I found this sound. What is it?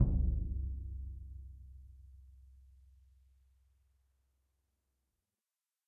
Ludwig 40'' x 18'' suspended concert bass drum, recorded via overhead mics in multiple velocities.